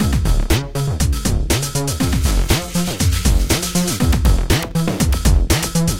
Thank you, enjoy